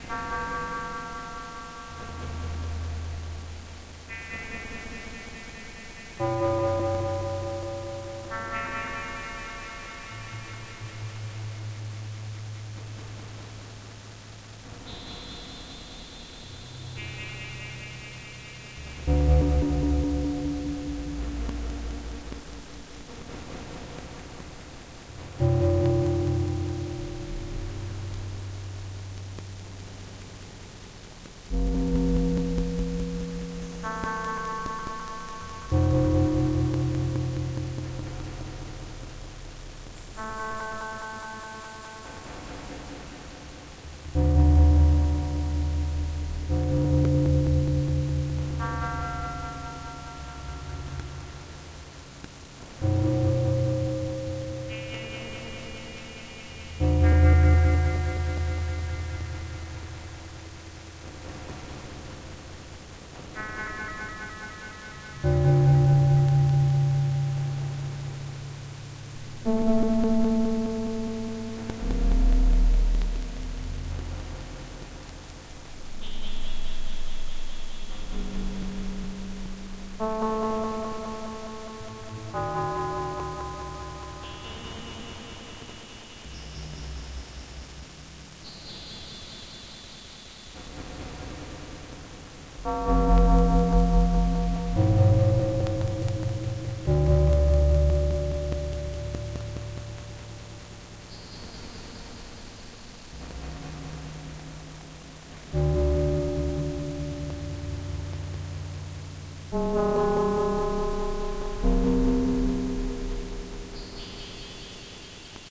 Rain & FM
Digital delay for background noise
west coast synthesis for bell and perc sequenced by wogglebug